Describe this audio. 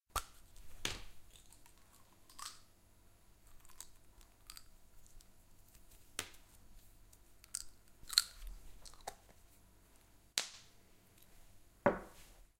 Opening the bottle, taking the pill out, dropping it on wooden table and closing. Recorded with Zoom's H6 stereo mics in a kitchen. I only amplified the sound.
bottle, field-recording, foley, medicine, pill